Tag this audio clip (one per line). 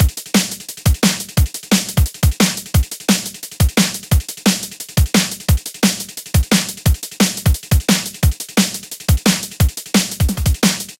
bassdnbloop
drum
free